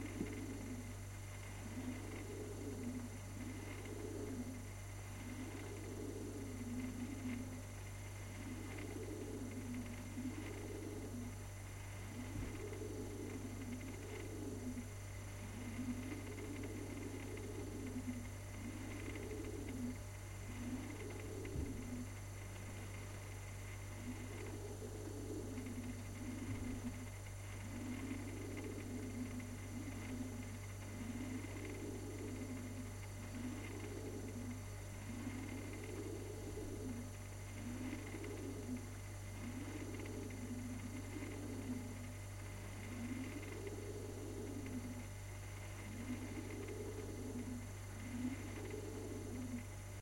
A recording of my fridge interior [Zoom H2]